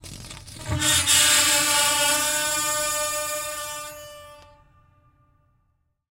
recordings of a grand piano, undergoing abuse with dry ice on the strings